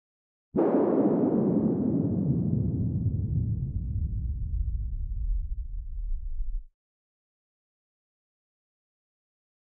Sound of a bomb obtained using noise and a bandpass filter
bomb, filter, LCS-13, noise, refugee, war